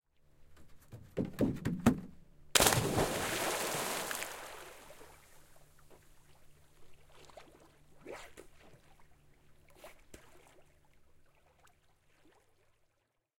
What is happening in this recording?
Sukellus, hyppy veteen / Dive, jump to the water
Mies hyppää laiturilta veteen, loiskahdus, vähän uintia, muutama juoksuaskel vauhtia puulaiturilla. Kesä.
Dive, jump to the water, splash, a few steps run-up on a wooden jetty.
Paikka/Place: Suomi / Finland / Vihti, Jokikunta
Aika/Date: 01.08.1991
Summer, Yle, Tehosteet, Suomi, Dive, Yleisradio, Field-Recording, Splash, Sukellus, Water, Finland, Jump, Vesi, Hyppy, Soundfx, Molskahdus, Finnish-Broadcasting-Company